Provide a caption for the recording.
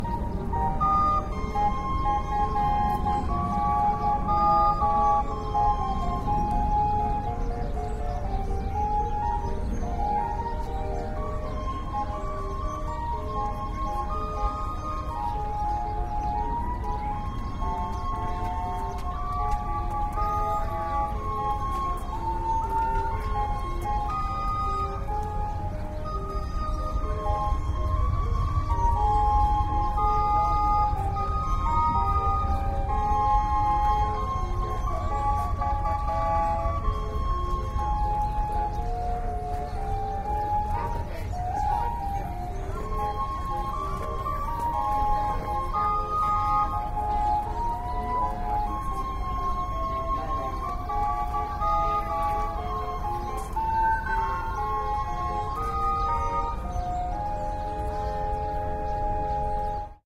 20110318 174020 Steamboat Natchez
This recording was made while standing on a bicycle path near the Mississippi River in the French Quarter of New Orleans, LA, 03-18-2011.
Prominent in the recording is the steam-organ / calliope of the steamboat S.S.
bicycle, pipe-organ, calliope, steamboat, bird, bird-calls, boat, music, field-recording, New-Orleans, paddle-boat, French-Quarter, steam-organ, organ, people, birds